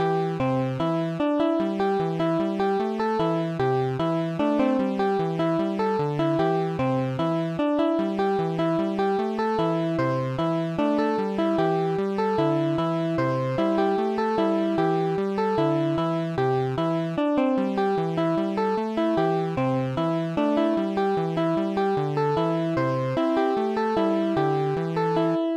Pixel Piano Adventure Melody Loop
Useful for 2d pixel game adventures or shops in city areas
Thank you for the effort.
adventure, music, piano, retro